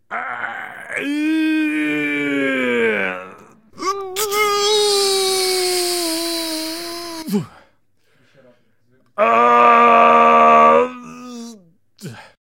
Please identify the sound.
Man Grunt1
Grunt, man, voice